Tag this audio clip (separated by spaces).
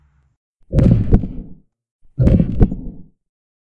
beating body heart heart-beat heartbeat heartbeating human human-beat